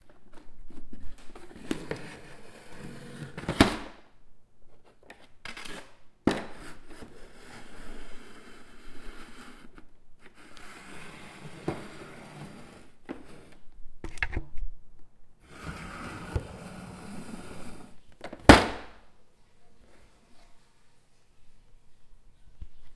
dragging a plastic bucket.
bucket, drag, plastic